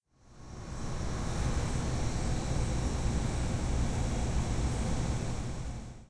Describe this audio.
juice fridge
This is the sound of the juice refrigerator at the CoHo, a cafe at Stanford University.